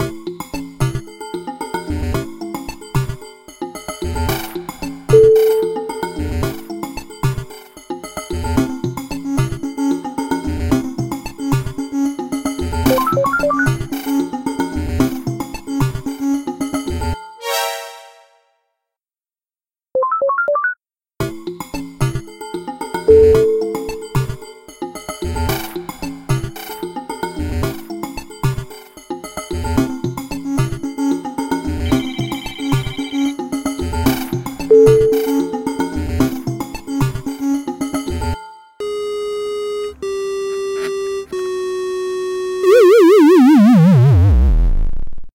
Game Setup
Game music project
Background, game, music, one, Studio